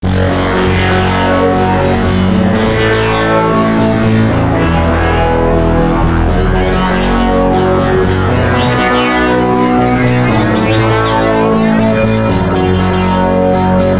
Arp Fsharp A E B 120bpm
I created these perfect Arpeggio Loops using my Yamaha PSR463 Synthesizer, my ZoomR8 portable Studio and Audacity.
loop, music, synthesizer, synth, Arpeggio, BPM, 120, rhythmic, electronic